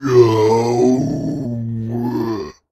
Zombie Growl 01
The sound of a zombie growling.
Recorded with a Blue Yeti microphone.
undead, monster, horror